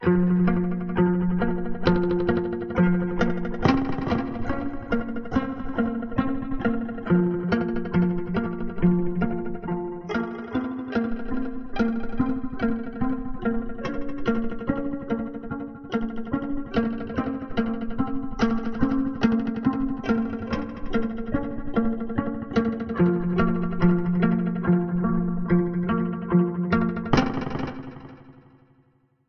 lacky box 1
Recorded from a little 'lacky box' I made from a cardboard box and a few elastic bands.
elastic, home-made, riff, toys